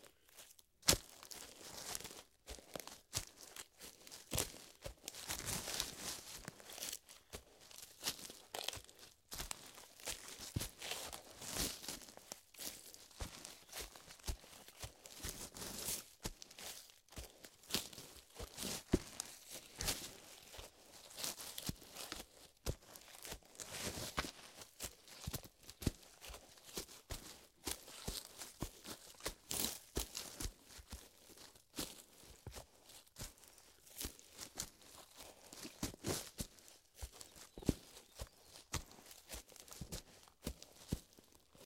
Footsteps Mens Dress Shoes Forest Floor
Men's dress shoes on leafy forest floor. Some breaking twigs.
foley, footsteps, sfx, soundfx